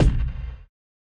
Power Motion Kick Drum - Nova Sound
Sound For The Peaceful 16 - Percussion Instruments developed by Nova Sound and Erace The Hate for the Power Two The Peaceful Campaign. Sounds Designed By Nova Sound
We need your support to continue this operation! You can support by:
Hop, Sound, Nova, Drum, Motion, Drums, Hip, Erace, Percussion, Hate, Kick, The, Power